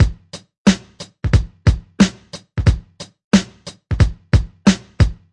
hip hop drum beat
This is a drum beat used quite often in rap/hip hop songs.
I used the "Abstract Kit 01" patch for Redrum in Propellerheads Reason 3.0.
hip-hop, rap, bass-line, 90-bpm